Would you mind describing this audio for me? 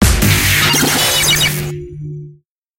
Radio Imaging Element
Sound Design Studio for Animation, GroundBIRD, Sheffield.